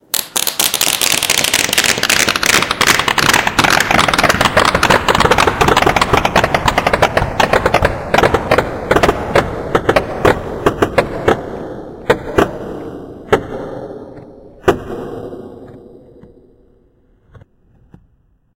Delay time is 0,21, pitch change per echo -2, number of echoes 20.